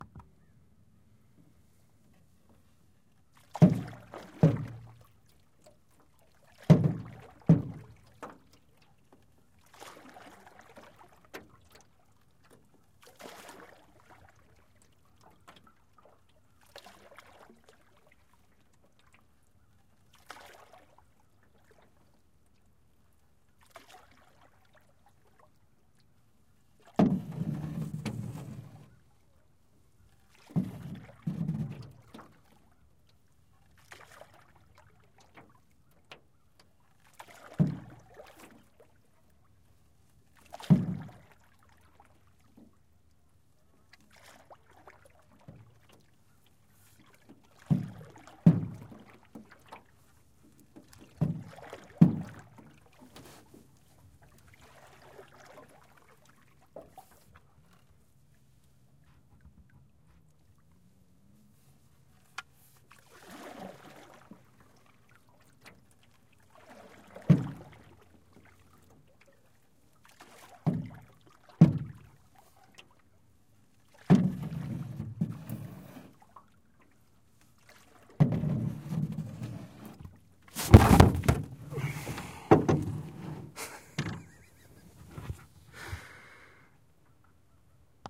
Paddle In Rowboat
recorded at Schuyler Lake near Minden, Ontario
recorded on a SONY PCM D50 in XY pattern